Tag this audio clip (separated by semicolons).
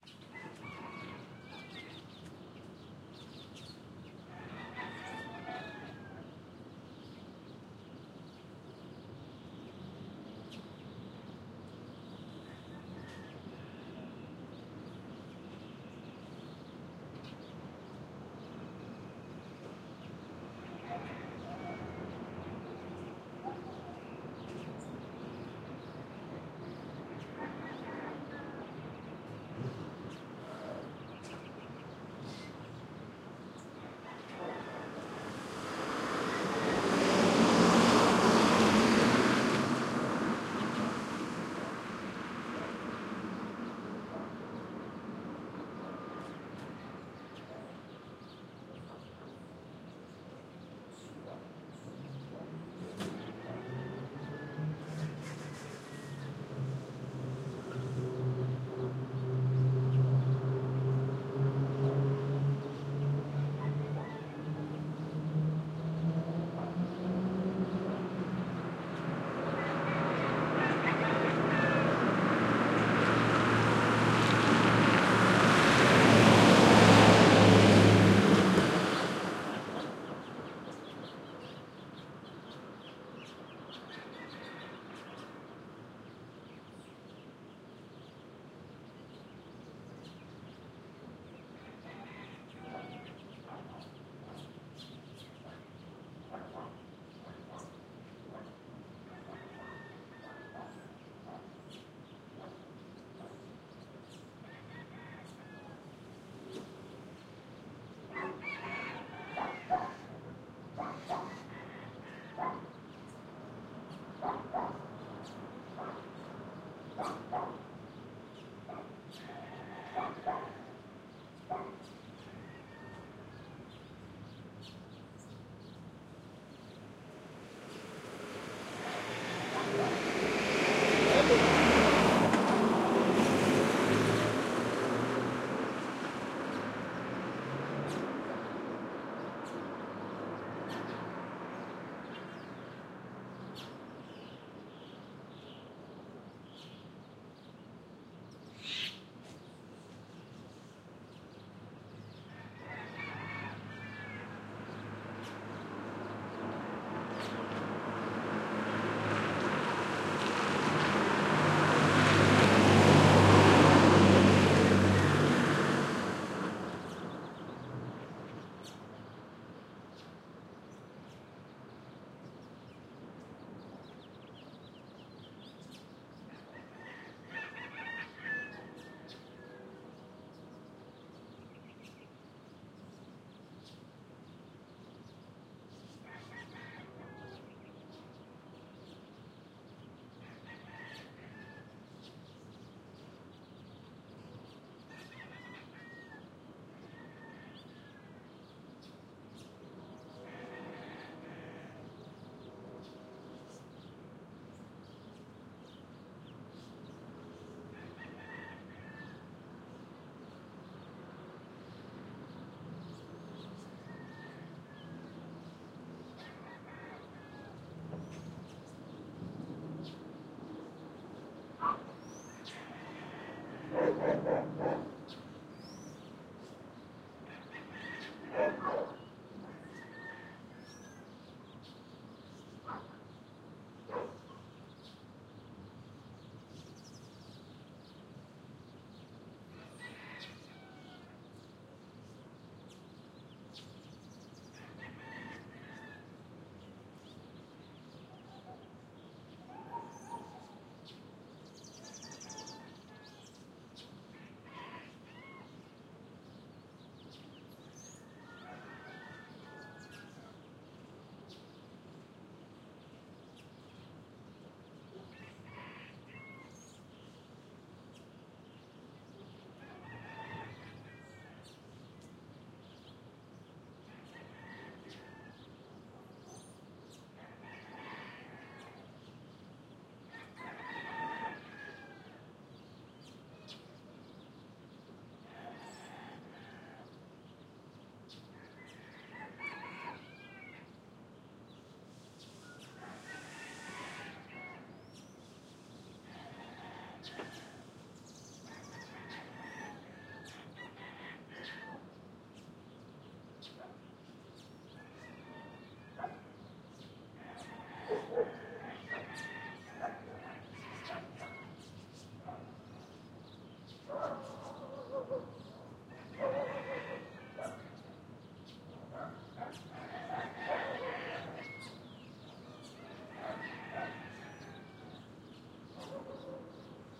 traffic; city; car; morning; residential; chicken; rooster; ambience; birds